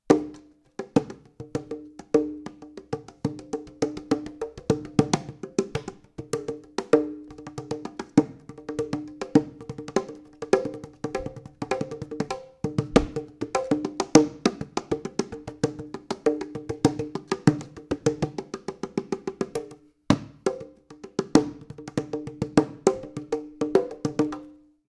A short recording of my friend drummer who had fun playing his bongos. It would be awesome if you could share some link to your music if you use this sample in your music.
bongos, drums, Electro, home-studio, percussion, percussive, RE320, Voice